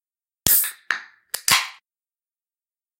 Logotype, Soda Can Opening
Logotype / Soda Can Opening
brand, can, drink, game, logo, logotype, music, open, opening, pop, popping, soda